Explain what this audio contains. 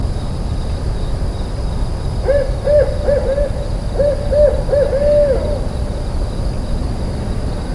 Recording of a Barred Owl hooting. This was recorded with a cheap parabola into a Zoom H2.